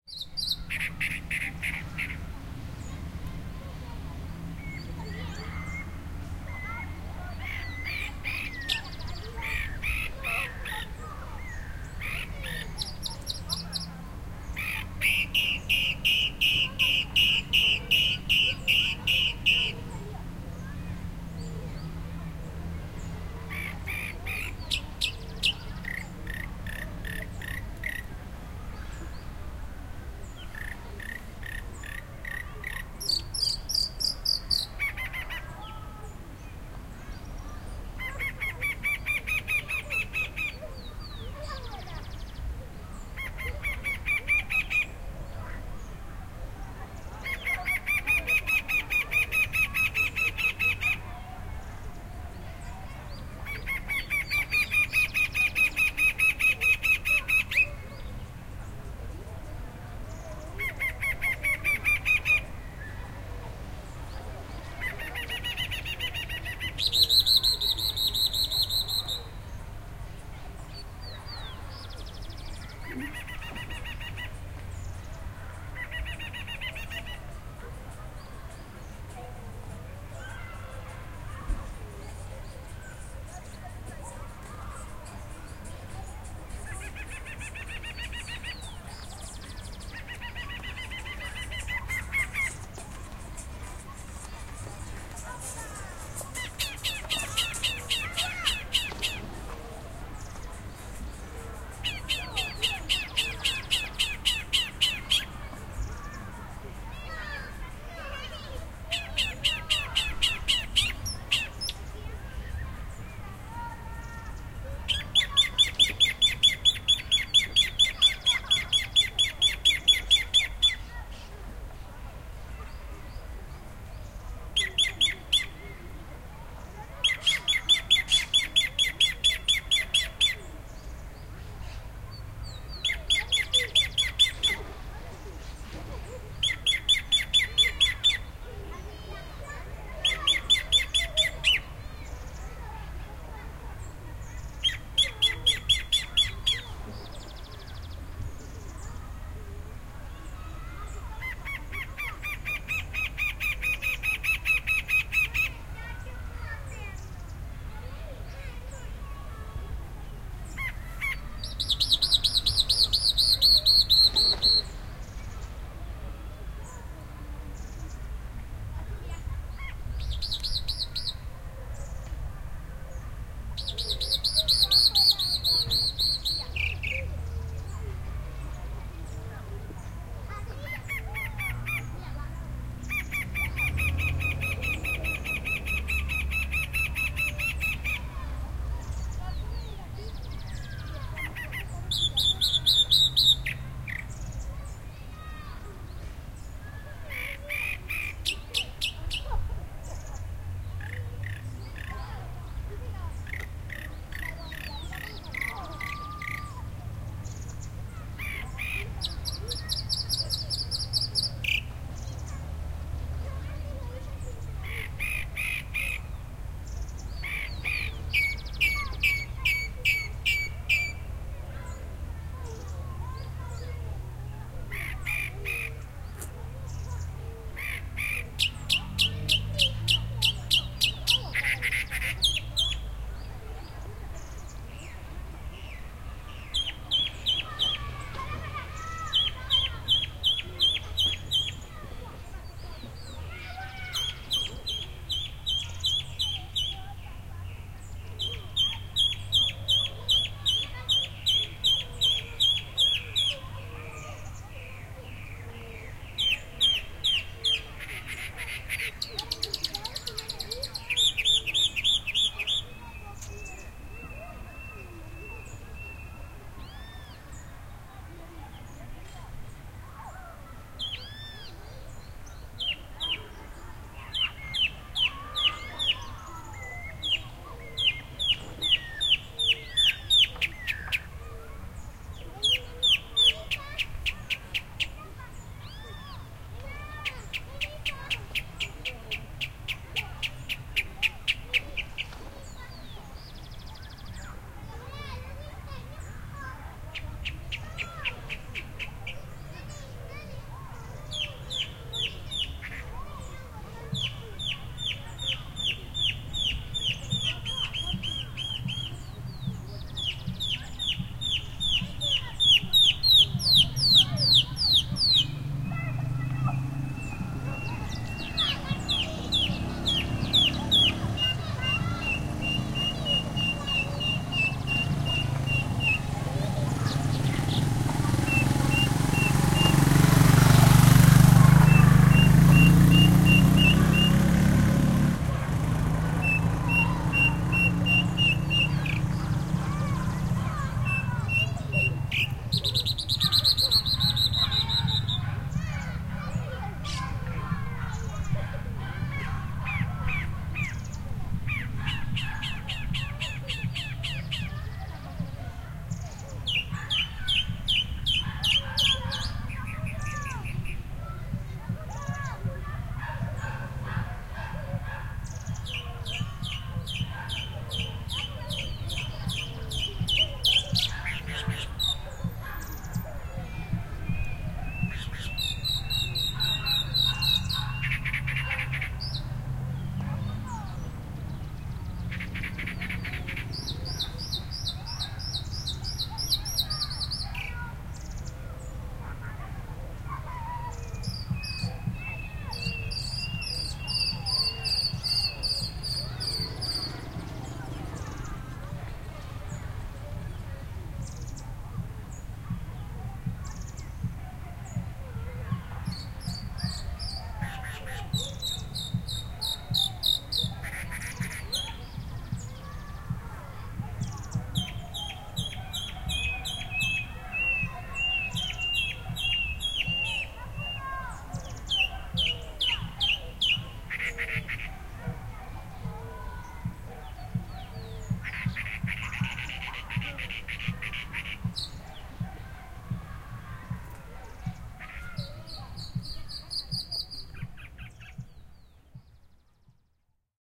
FR.BirdChatAmbience.27
It jumps...twirls...chats...jumps...
ambience, field-recording, noise, sound, bird, zoomh4, wind, argentina, kids, bike, nature